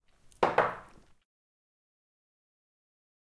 laying down a glass on a wooden table